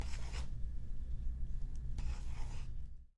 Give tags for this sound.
en; Escribiendo; Papel2

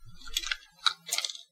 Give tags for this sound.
ruffle; shift; shuffle

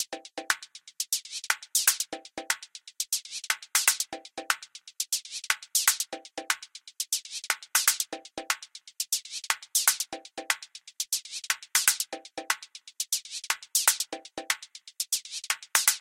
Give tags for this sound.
hi-hats; percussion; 120-bpm; electronic; synthesizer; hats; durms; clap; reverb; drumloop; loop

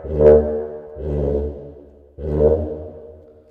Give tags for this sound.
bamboo; percussion; folk; rubbing; ethnic; putip; membrane; clay; caccavella; naples

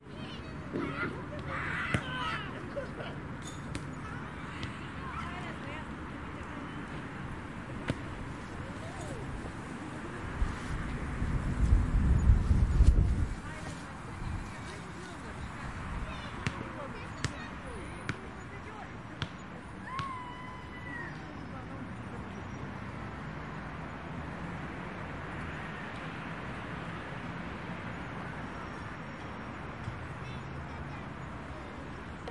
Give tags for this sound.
ambient; park

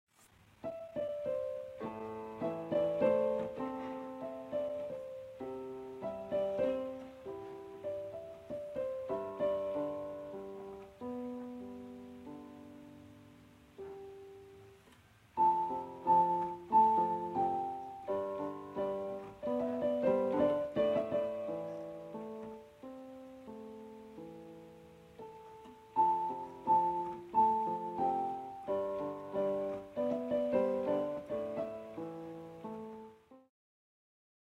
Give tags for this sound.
ambiance; background

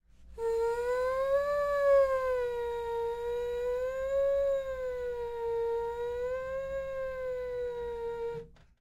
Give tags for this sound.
Alarm; alert; fire-alarm; warning

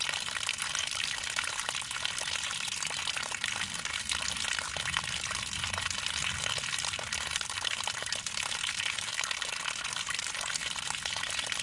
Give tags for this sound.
stream; water; spurt; pee; nature; piss; urinating; field-recording; liquid

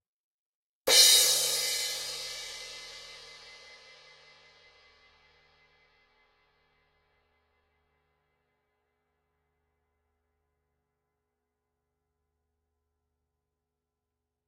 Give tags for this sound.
17; Crash; Dark; Harder; Hit; Zildjian

